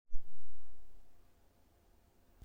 iphone5 strange waveform at beginning-quiet
We develop iPhone app that perform musical analysis on recorded audio from the iPhone. Our app implementation make use of the Audio Queue service to receive raw audio buffers from the audio queue callback.
In the first version of our app we had the problem of too much clipping on the recording which degrade the accuracy of our analysis. We also suspected that the noise canceling algorithm in iPhone 5 produce distorted sound, which is not much noticeable by human ear but distorted enough to affect our sensitive algorithm.
We found that the solution to our problem is to set the audio session mode to kAudioSessionMode_Measurement. This session mode is supposed to give maximum freedom for us to control the microphone input, which include turning off the automatic gain control and probably noise canceling as well.
The solution works very well except that it introduce a strange waveform pattern in the beginning of all recordings in iPhone 5.
bug, glitch, iphone5, core-audio